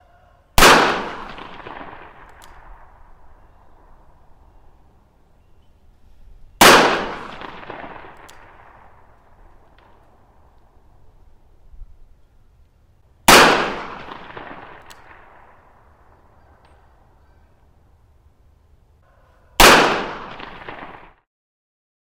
44 magnum fired in an open field with a fair amount of echo from surrounding trees.